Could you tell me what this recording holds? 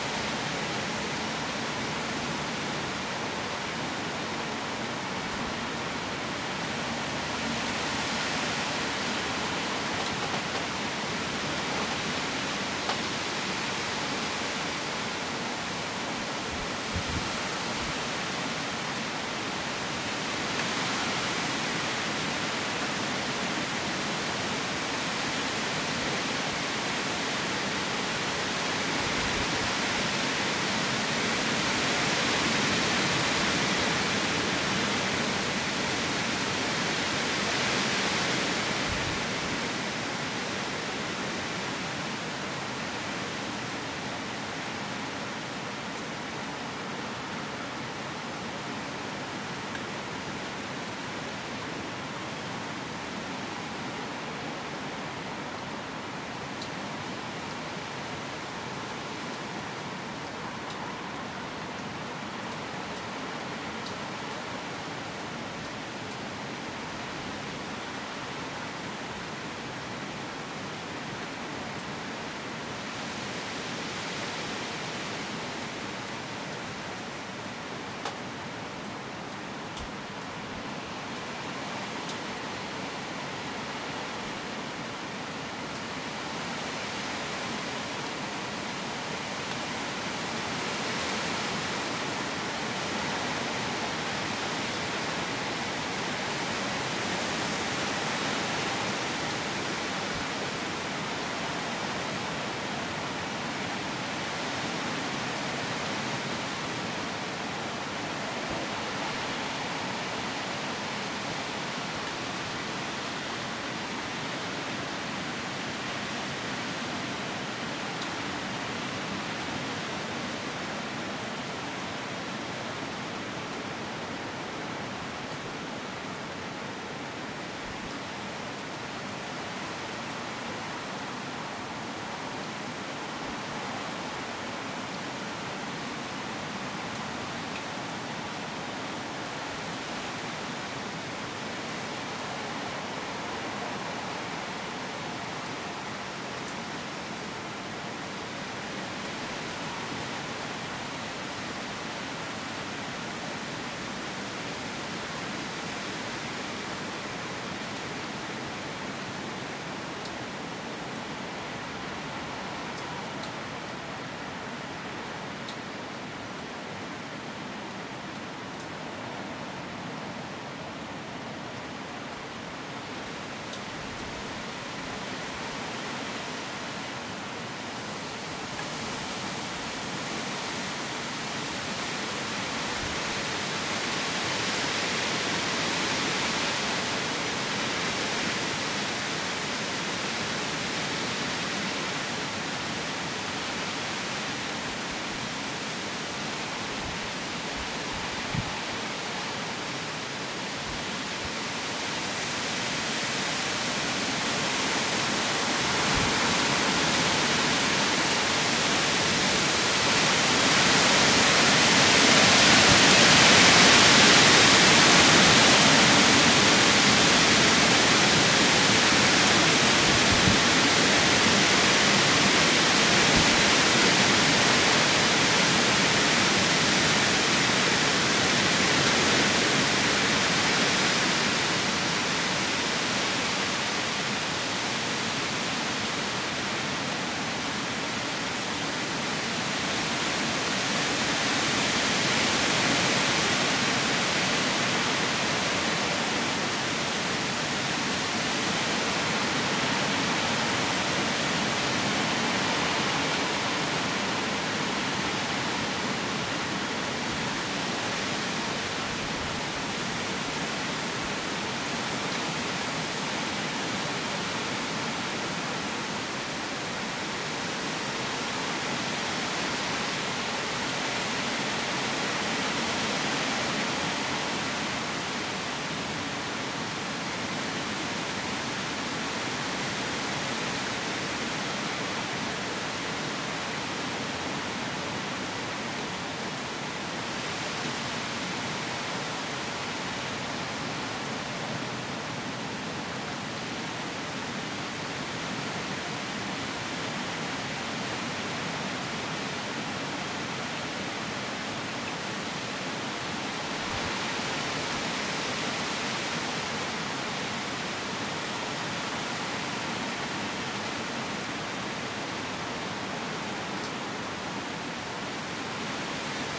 Wind in the Trees, Storm 2
Recording of wind and rain in a storm in south UK, 28th Oct 2013. Wind can be heard blowing through nearby woods and leaves rustling. Mostly white noise hissing with volume rising and falling. Recorded using a Canon D550 out the window/door. The files were edited to remove wind when it directly blows on the microphone.
field-recording, bleak, storm, trees, psithurism, gusts, weather, nature, leaves, wind, rain, gale